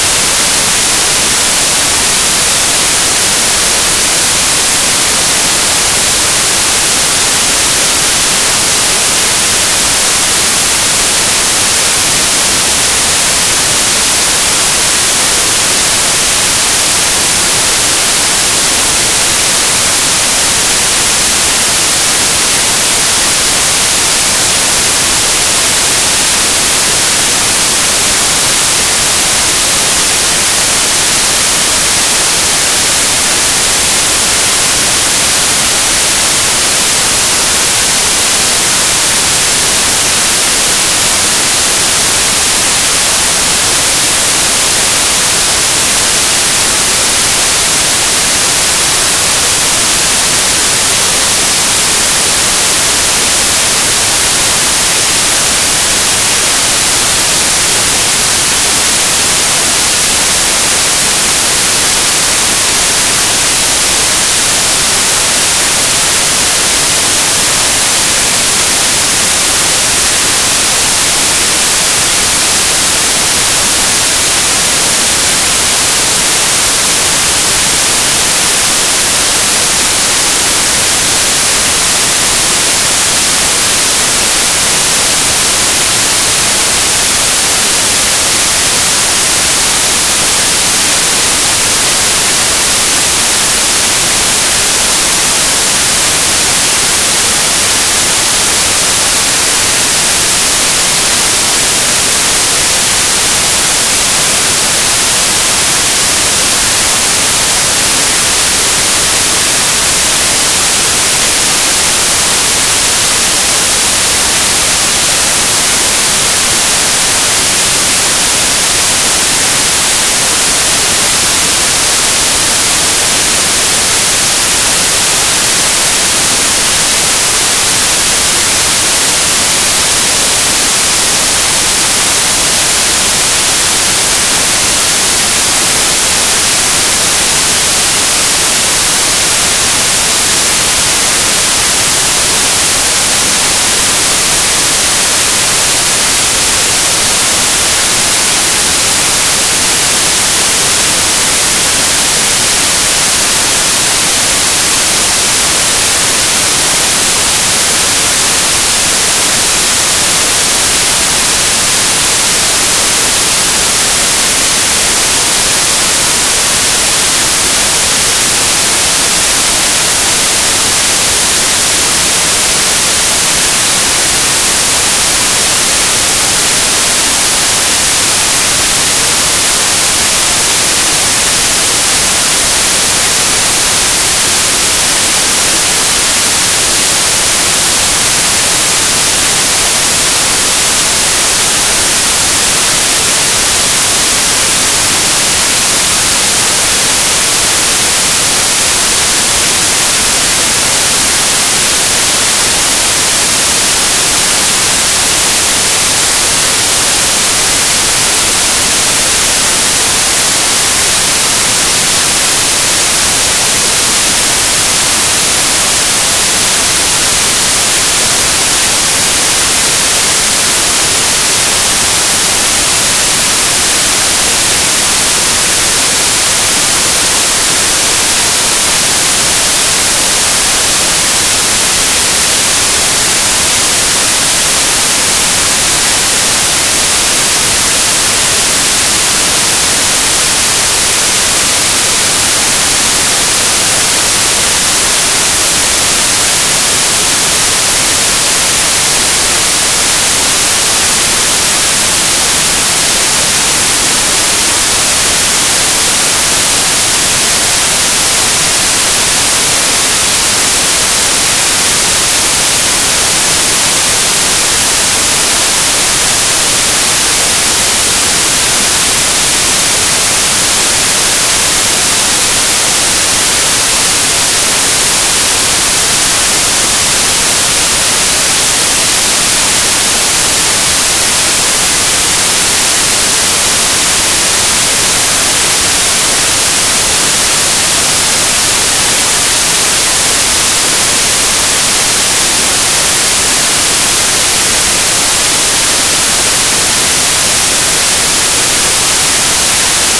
5 minutes of high quality white noise